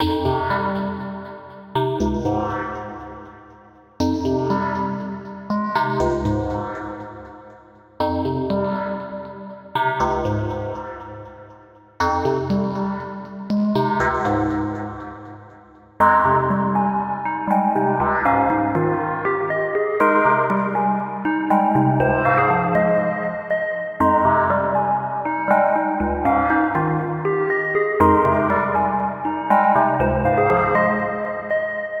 I'm floating away .
Short and sweet loopable synth riff. Just one of my randoms, enjoy.
I'm putting this in a pack called Random Music Shorts, (short 16 or 32 bar riffs and music loops) which I will be adding to every week.
music, short, relaxing, tear, synth, riff, drop, clouds, game, water, loop, soothing, ambient, sweet